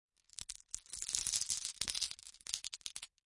Glass marbles being poured back and forth from one hand to another. Grainy, glassy sound. Close miked with Rode NT-5s in X-Y configuration. Trimmed, DC removed, and normalized to -6 dB.
marble, glass, shuffle, pour